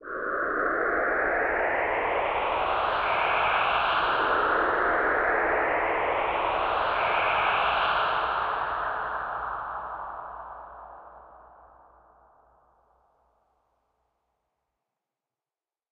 Creepy Wind Suction
weird eerie sound i made by using multiple reverb and some distortion on an ascending ethereal vox, thought it sounded pretty cool so i decided to upload it. if you find some use for it i would love to hear how it comes out!
creepy, sound, wind, fx, suction, eerie